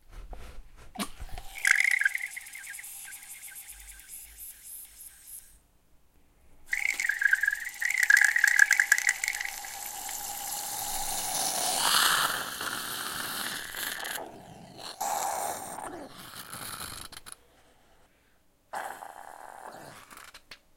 Alien thermos
This is the weird sound of my thermos flask after pouring boiling water. The sound is created by the pressure of the water trying to escape.
Sounds like and alien language!
Recorded with the Zoom H4N.
water, organic, thermos, weird, experimental, liquid, pressure, alien